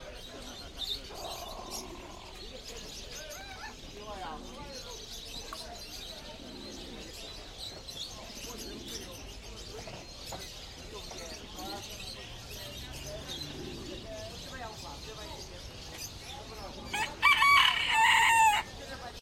porto birdmarket pigeons cock 28
Porto, Portugal, 19.July 2009, Torre dos Clerigos: Atmosphere on a birdmarket close to a cage with chickens and pigeons. Silent curring of pigeons, a cock-a-doodle-doo in the end and people chatting in the background.